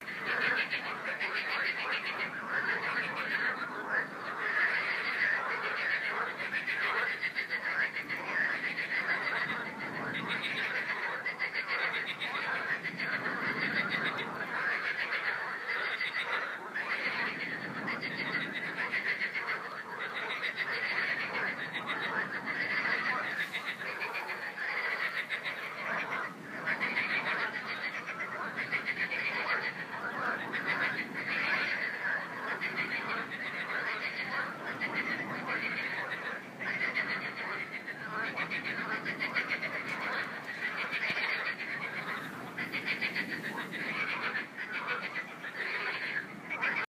Сroaking frogs in the river. Recorded in Krasnodar Krai, south of the Russia, Black Sea coast. April 2016.
Krasnodar Krai is located in the southwestern part of the North Caucasus and borders with Rostov Oblast in the northeast, Stavropol Krai and Karachay-Cherkessia in the east, and with the Abkhazia region (internationally recognized as part of Georgia) in the south. The Republic of Adygea is completely encircled by the krai territory. The krai's Taman Peninsula is situated between the Sea of Azov in the north and the Black Sea in the south. In the west, the Kerch Strait separates the krai from the contested Crimean Peninsula, internationally recognised as part of Ukraine but under de facto Russian control. At its widest extent, the krai stretches for 327 kilometers (203 mi) from north to south and for 360 kilometers (220 mi) from east to west.